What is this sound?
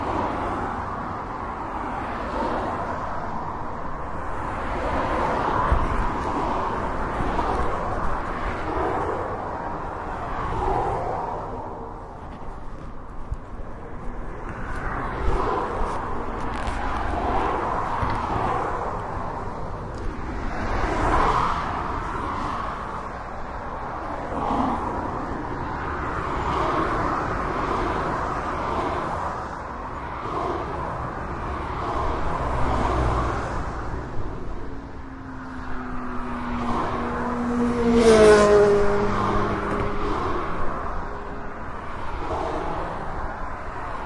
Fast dual carriageway, panned
car, cars, fast, field-recording, motorbikes, race, street, traffic